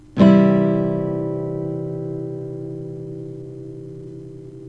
used TAB: 01023x(eBGDAE)